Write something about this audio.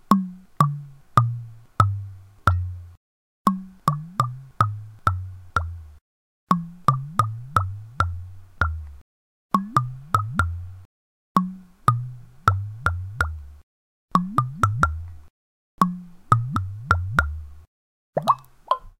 bottle-glugs
I tilted a wine bottle so that air escaped through the neck in glugs.
bottle, bubble, glug, glugs, liquid, pour, pouring, water, wine